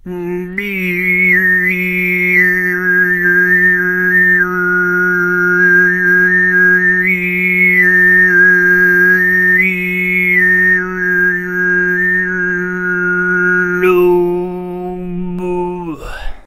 alfonso high 20
From a recording batch done in the MTG studios: Alfonso Perez visited tuva a time ago and learnt both the low and high "tuva' style singing. Here he demonstrates the high + overtone singing referred to as sygyt.
high, overtones, singing, sygyt, throat, tuva